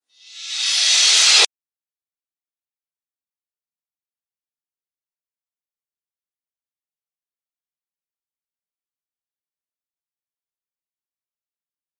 Rev Cymb 27
Reverse cymbals
Digital Zero
cymbal, cymbals, metal, reverse